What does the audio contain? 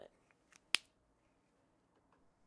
putting on a maker lid
putting on a marker lid
class, intermediate, sound